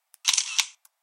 sl50 photo capture with flash
Samsung SL50 click - photo capture with flash
camera, click, photo, samsung, shutter, sl50